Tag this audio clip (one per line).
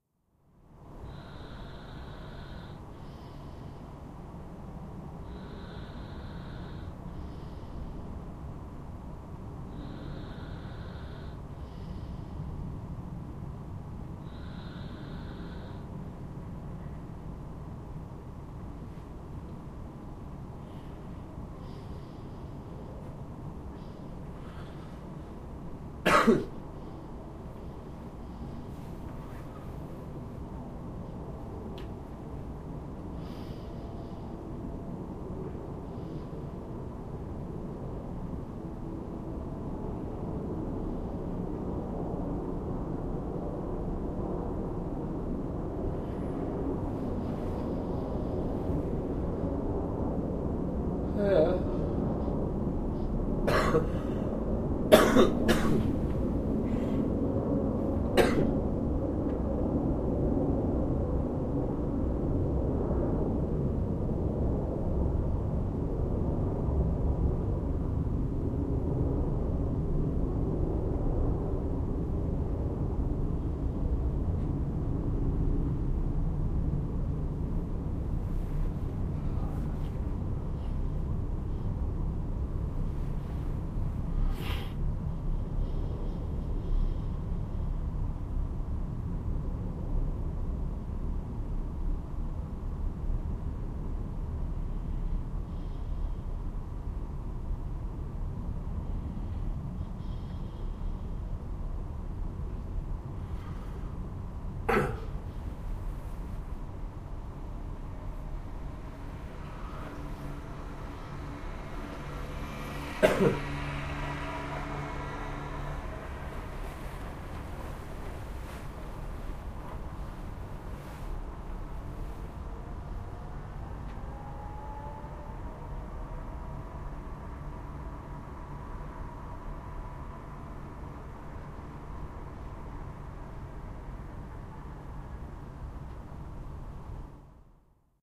street-noise
engine
human
body
noise
field-recording
household
airplane
street
nature
bed
breath
traffic